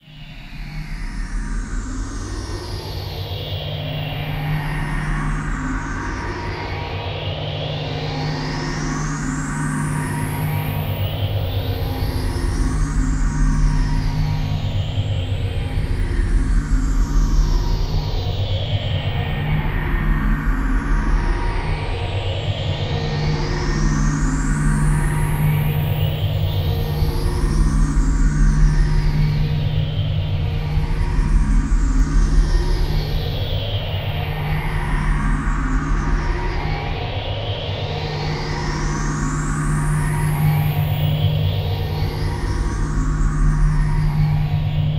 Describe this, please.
sine tone sweep mesh
A post-industrial feeling created with a tone generator, layering and reverb.
industrial, mesh, modulation, post-industrial, sine, sweep, tone, wave